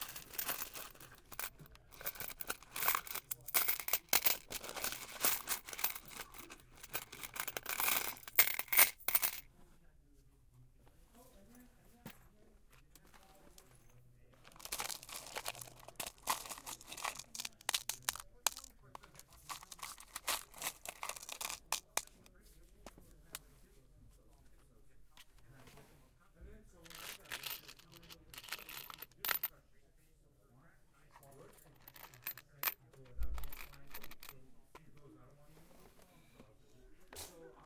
fondling a lot of nuts and bolts and dropping them into their plastic box
one in a series of recordings taken at a hardware store in palo alto.
nuts, bolts, hardware-store, plastic, dropping, metal, many-of-the-same-thing